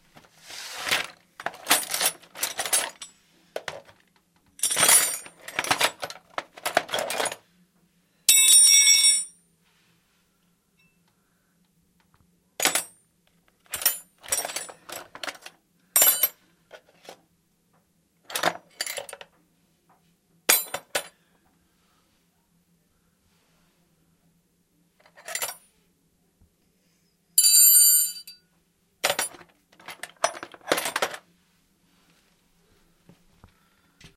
toolbox automobile workshop
workshop, automobile, toolbox